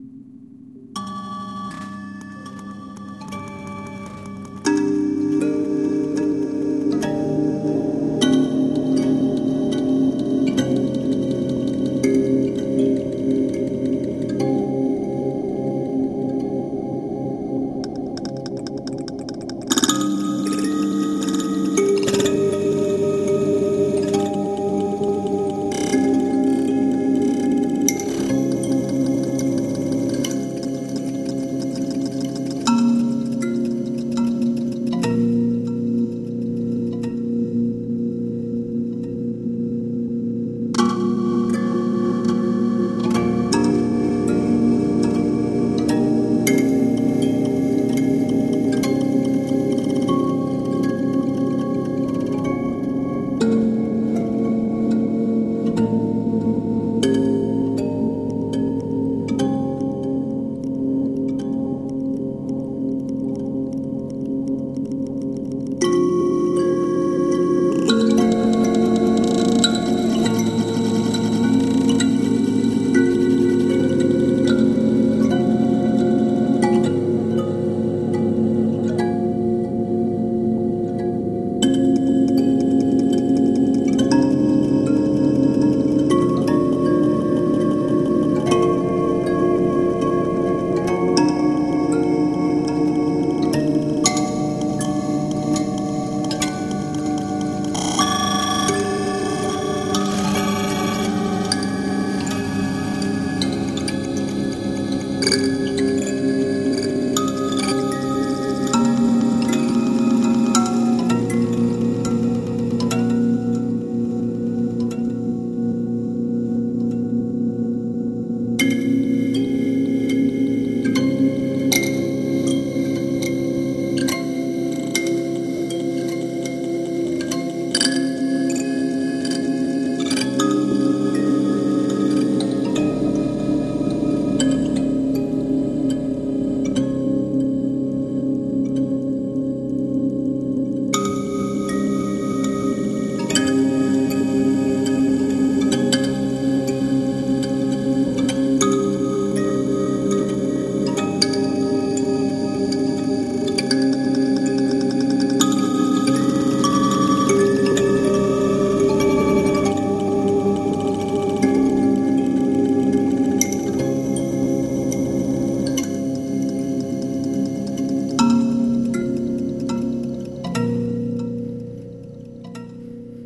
Gated Kalimbas
Formatted for use in the Make Noise Morphagene. These sounds are Kalimbas, given to the morphagene, recorded, rearranged by the morphagene, and now prepared back into the morphagene. I was super interested in making reels that were actually in fact created in the morphagene. I found these to be the most fun!
rearranged, iterative, kalimba, morphagene, mgreel